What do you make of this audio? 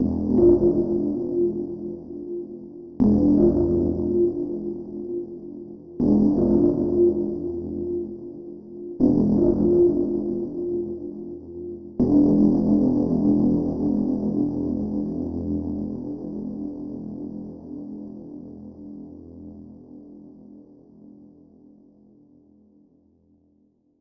Typical underwater atmosphere. This is a synthetic sound created and layered with several synthesizers.
cinematic, clumsy, cold, dark, deep, impending, metallic, muted, resonant, roar, roaring, slow, submarine, under-water, underwater, water